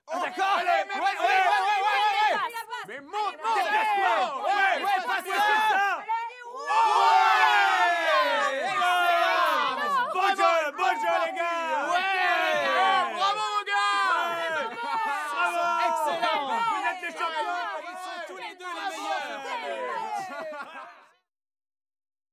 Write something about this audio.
Interior vocal (French) ambiences: sport event crowd
crowd, interior, localization-assets, sport, vocal-ambiences